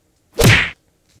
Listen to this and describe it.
A sound of a punch.